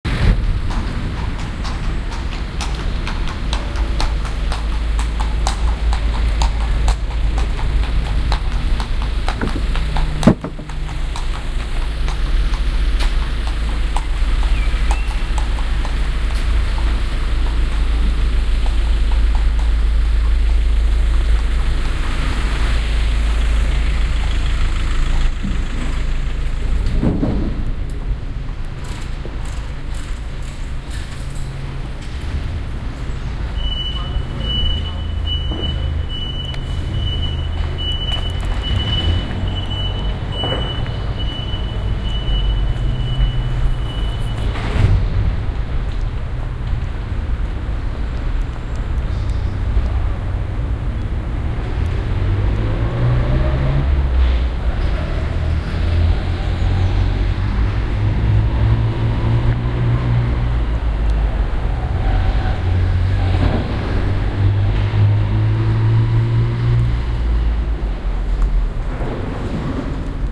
Marble Arch - Girl riding a horse
london, city, station, field-recording, underground, london-underground, metro, tube, binaural, train